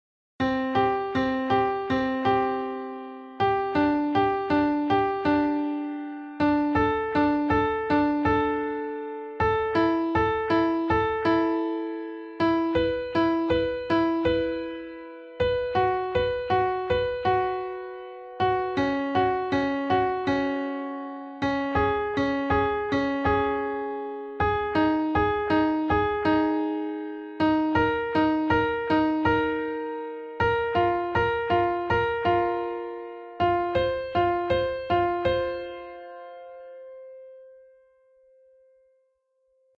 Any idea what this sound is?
Circle Of Fifths starting from Middle C to c